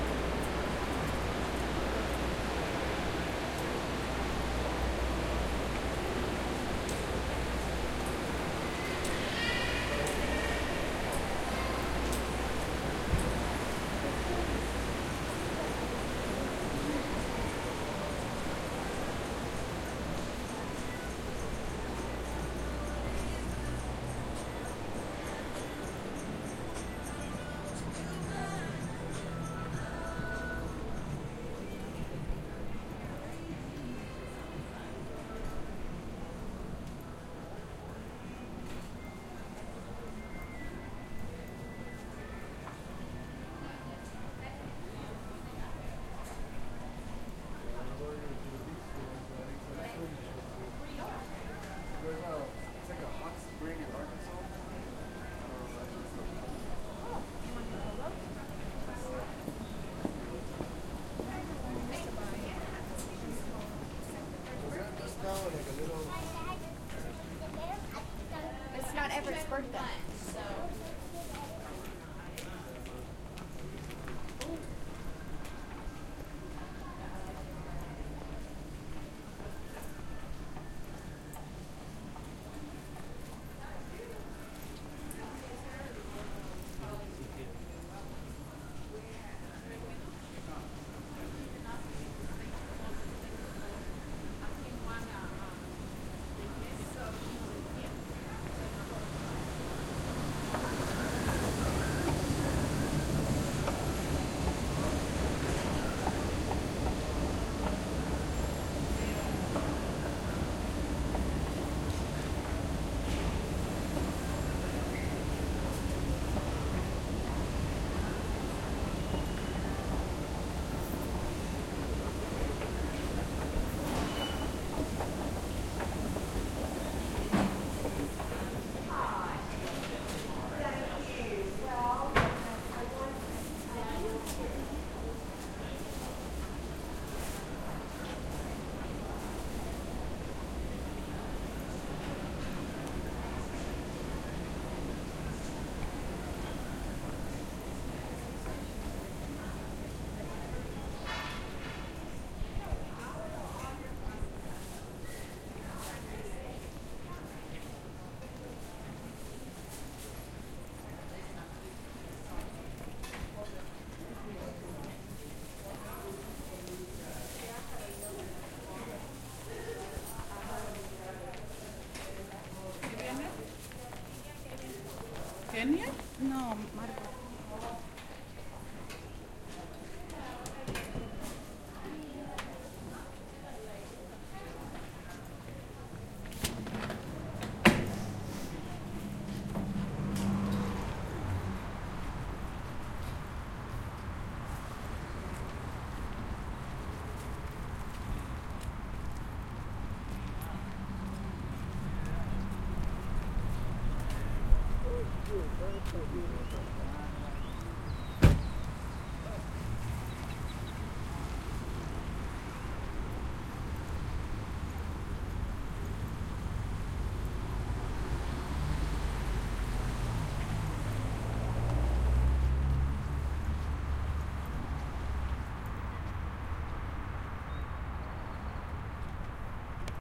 Mall Macys Outside transition
Part of the Dallas-Toulon exchange project.
Time: April 1st 2011, 12:46
Recording from inside the mall to inside Macy's (clothing store). Go down escalator stairs and out the store to the parking lot.
Density: 4
Polyphony: 5
Chaos/order: 3
Busyness: 4
transition, field-recording, mall, store, parking, dallas, outdoor, indoor